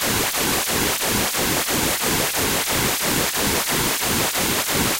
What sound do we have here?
Independent channel stereo pink noise created with Cool Edit 96. Flanger effect applied liberally.